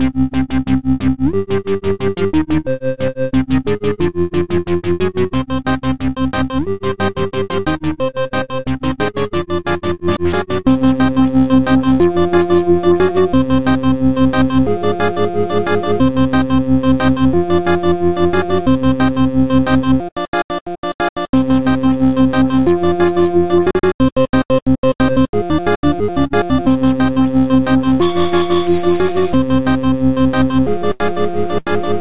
Stupid 8bit loop
180, 8-bit, 8bit, bossa, broken, glitch, hardtek, long-loop, loop, melodic, stupid